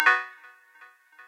eventsounds2 - HighBleeps 4
I made these sounds in the freeware midi composing studio nanostudio you should try nanostudio and i used ocenaudio for additional editing also freeware
intros, startup, intro, game, event, desktop, blip, effect, bootup, sound, application, clicks, click, sfx, bleep